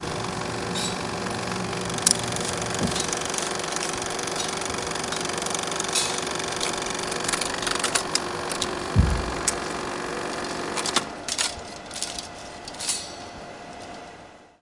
16 - Turning off projector
Turning off a 16mm projector - Brand: Eiki
Apagado de proyector de 16mm - Marca: Eiki
16mm, field-recording, projector